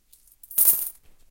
coins-falling-022
A palms worth of coins falling onto a pile of coins.
clink, clinking, coins, drop, dropping